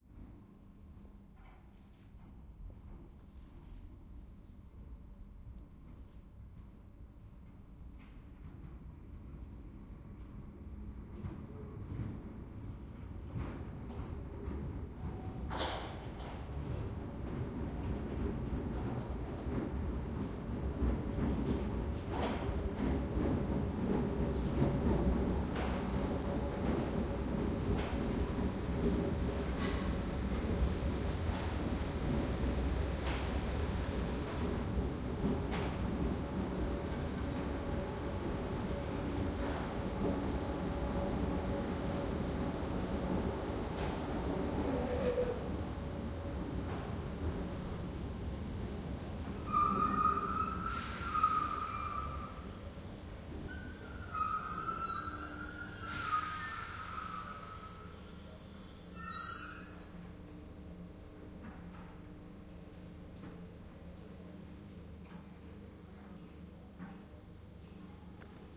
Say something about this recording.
Slow train approaches an underground station
Slow Train approaches Moorgate Station.
ambience, announcement, atmos, england, field-recording, great, london, loop, metro, omnidirectional, platform, rail, railway, service, station, stereo, subway, train, transport, tube, underground